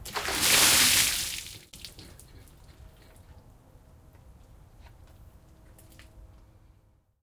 Request from Bulj for a bucket of 'water' poured over someone's head.
Second version. I had to record this outside - so there might be a little outdoor ambi.
Plastic hobby horse stood in for the victim. Water poured from plastic bucket... I didn't realize until after I transferred it, that the horse rocked a bit after dowsing, whoever uses this sample ought to cover the sound with the utter shock and flailing body of the victim.
Recorded with a Sony ECM-99 stereo microphone to SonyMD.
splash, bucket, water, environmental-sounds-research